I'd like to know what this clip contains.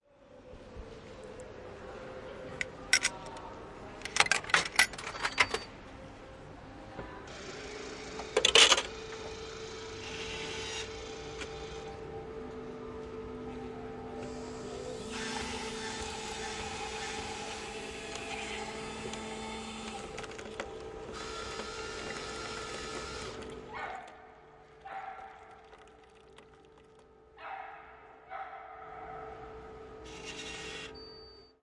caffe machine
Panska, Pansk, Czech, CZ